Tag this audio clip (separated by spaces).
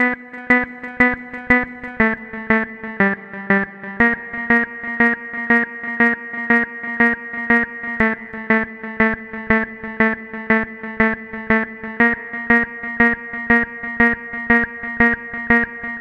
bpm minimal 120 synth electro-house delay house electro